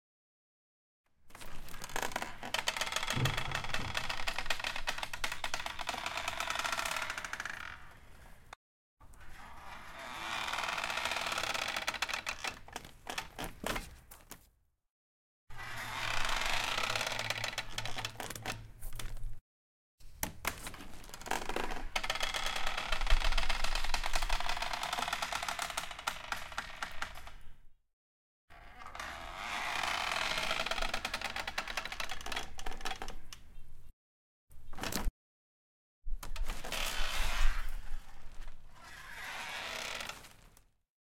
Creaky Door - Unprocessed
The sound of a creaky door, recorded with my Zoom H5.
ambiance, creepy, dark, door, eerie, field-recording, gate, goth, gothic, Halloween, haunted, horror, house, macabre, movie, sinister, spooky, stock, terror